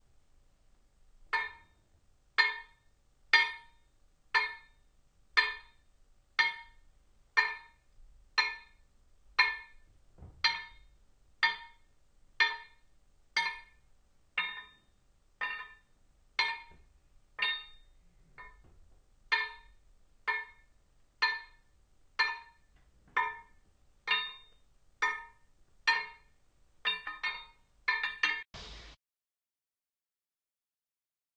golpeando cosas metalicas
Golpe de metal contra metal
Audio-Technica, diseo, dmi, estudio, golpe, interactivos, medios, metal